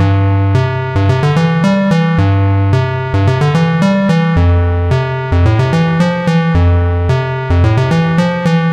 Part of the Epsilon loopset, a set of complementary synth loops. It is in the key of C major, following the chord progression Cmaj7 Fmaj7. It is four bars long at 110bpm. It is normalized.